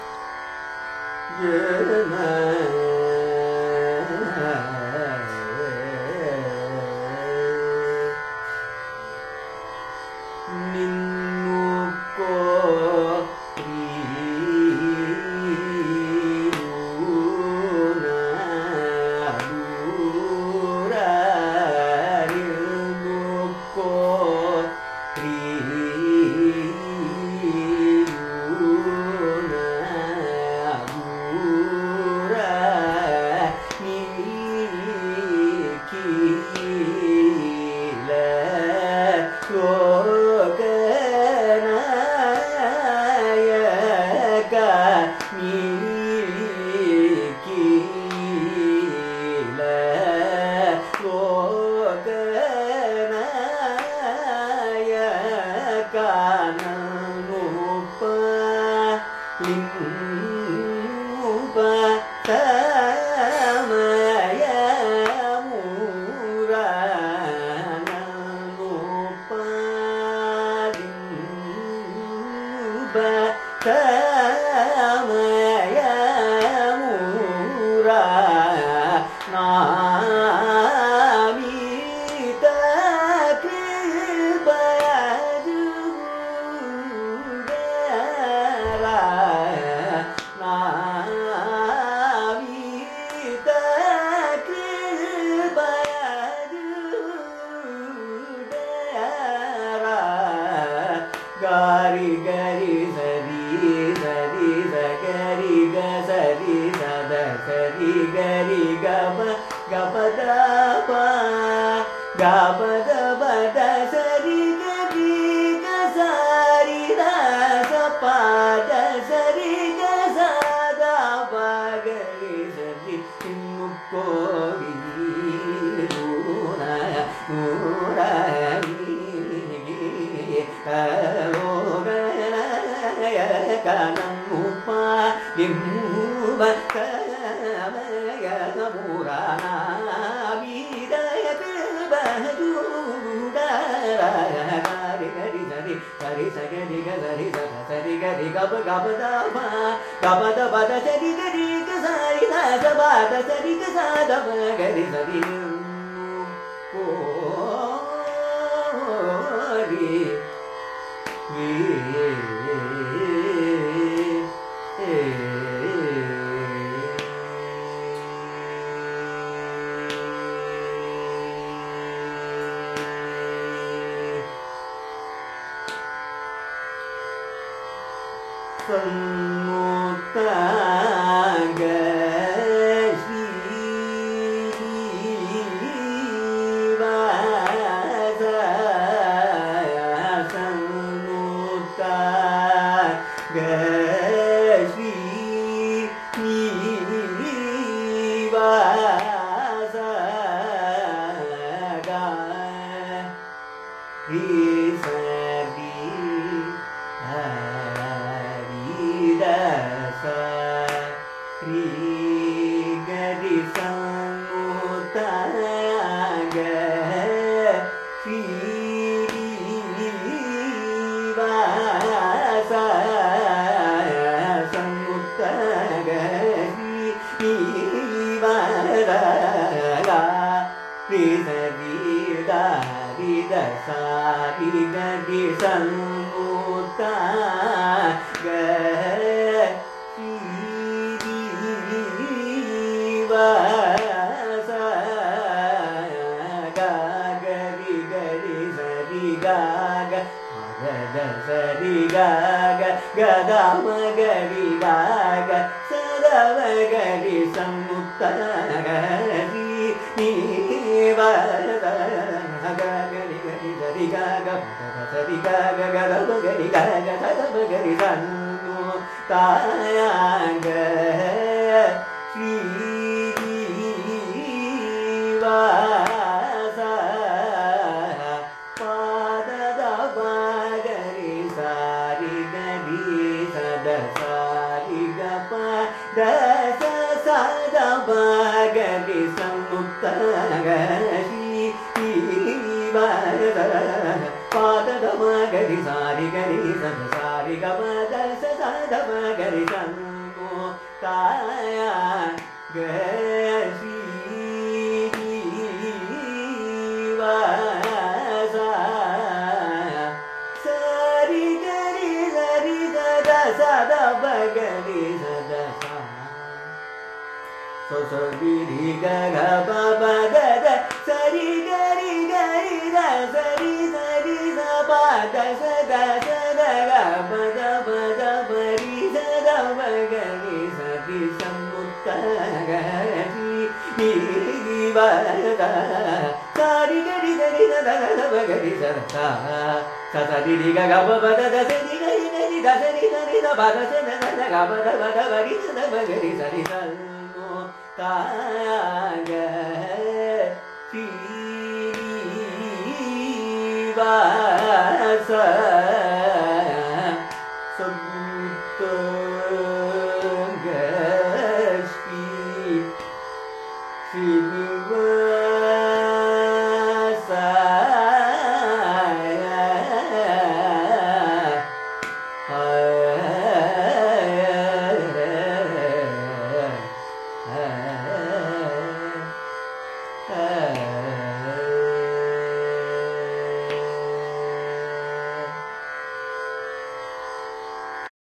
Carnatic varnam by Prasanna in Mohanam raaga
Varnam is a compositional form of Carnatic music, rich in melodic nuances. This is a recording of a varnam, titled Ninnu Koriyunnanura, composed by Ramnad Srinivasa Iyengar in Mohanam raaga, set to Adi taala. It is sung by Prasanna, a young Carnatic vocalist from Chennai, India.